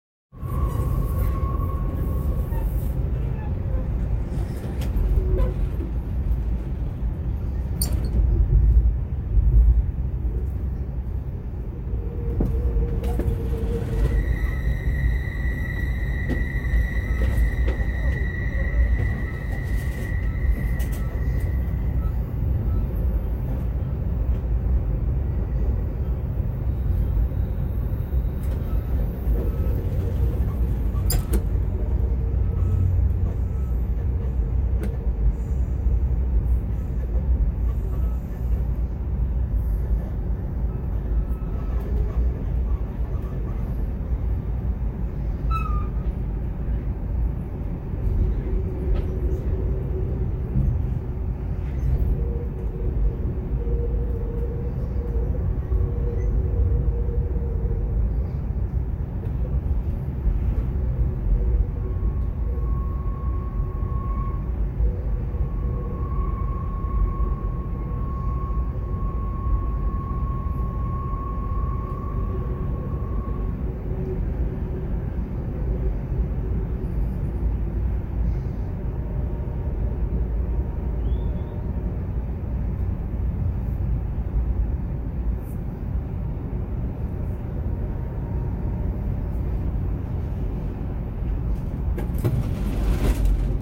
Recorded on a train while going through a windy mountain pass